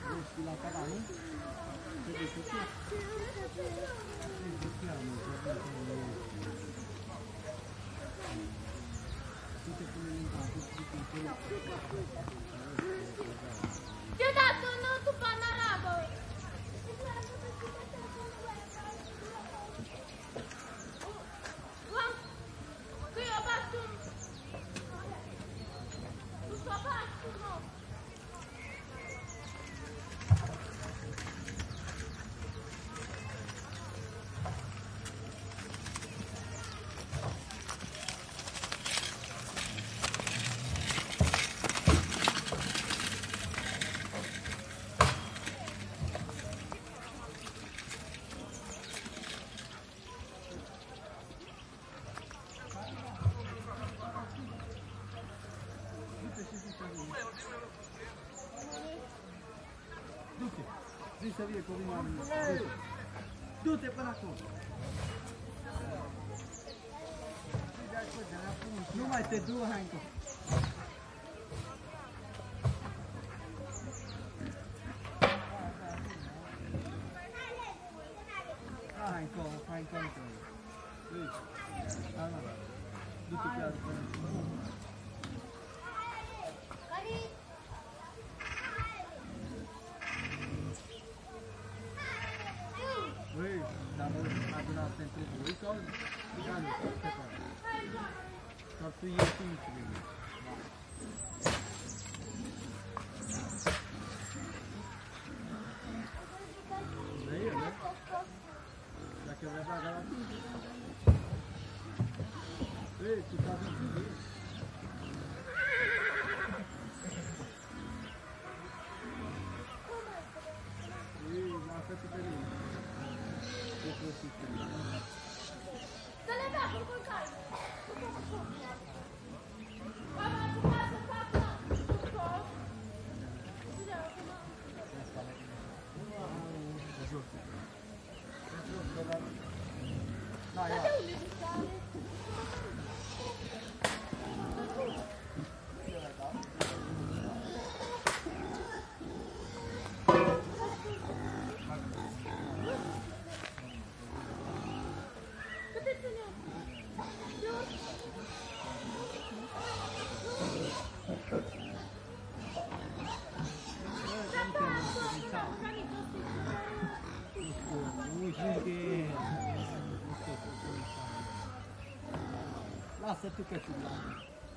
201007 Tichindeal GypStl Well Morning st
An early autumn morning in a settlement of Roma gypsies the Transsylvanian village of Țichindeal/Romania, basically just two mud roads with about 50 or so hovels in various states of disrepair.
The recorder is standing at the entrance to the settlement, children are shouting to each other, grown ups are going about their business, birds can be heard in the background, as well as a horse and some pigs grunting and squealing in a makeshift pigsty nearby. Someone trundles a hand cart by at the start of the recording.
Recorded with a Rode NT-SF1 and matrixed to stereo.
ambience, birds, countryside, field-recording, gypsy, horse, people, pigs, Roma, Romania, rural, talking, Transsylvania, village, work